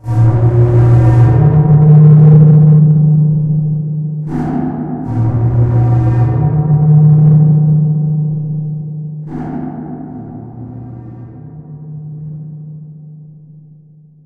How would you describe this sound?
reverberated and distorted double shot sub-bassI needed aggressive sounds, so I have experienced various types of distortion on sounds like basses, fx and drones. Just distorsions and screaming feedbacks, filter and reverbs in some cases.
dark, illbient, rumble, ambient, bass, soundtrack, electro, atmosphere, scream, score, soob, sub, feedback, deep, low, backline, powerful, horror, ambience, distorsion, punch, tense, suspence, drone, film, pad, creepy, backgroung